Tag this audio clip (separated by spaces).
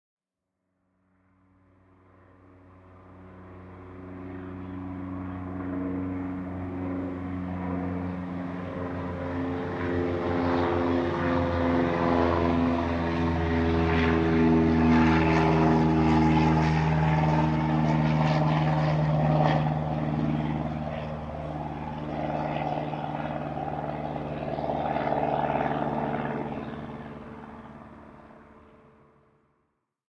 aeroplane aviation plane